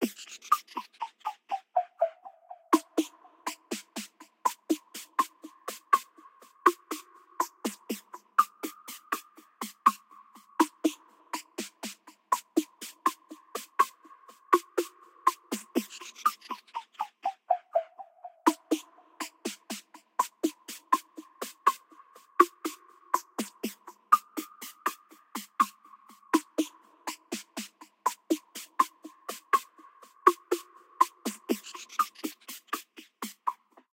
EFe JanCasio 61bpm
retro drum-machine loop recorded from 70´s organ+fx-------------------------------------------------------------------------------------------------------------------------------------------------------------------------------------------------------------------------------------------------------------------
analog, drum-loop, drum-machine, electro, percussion, retro, vintage